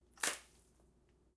Liquid splatter on floor 3
Liquid splattering on the floor.
blood, floor, liquid, spill, splash, splat, splatter, water, wet